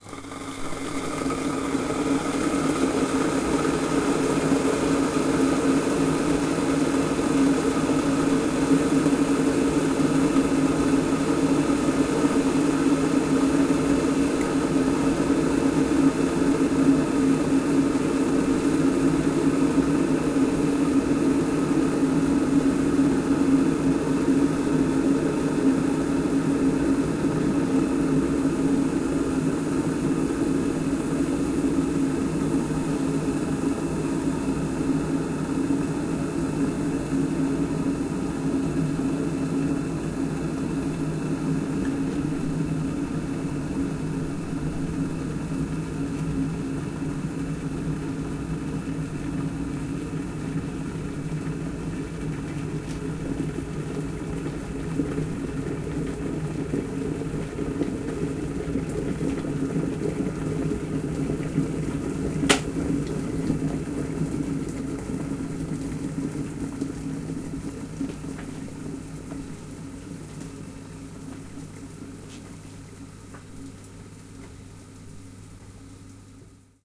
Electric Kettle Boiling
An electric kettle full of water boiling, turning itself off, then cooling.